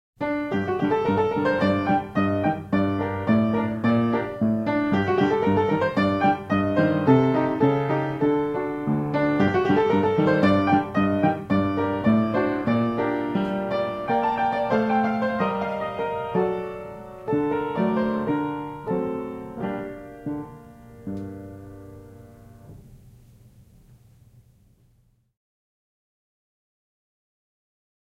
Silent Movie - Sam Fox - Oriental Veil Dance 2
Music from "Sam Fox Moving Picture Music Volume 1" by J.S. Zamecnik (1913). Played on a Hamilton Vertical - Recorded with a Sony ECM-99 stereo microphone to SonyMD (MZ-N707)
film, piano, movie, silent-film, 1920s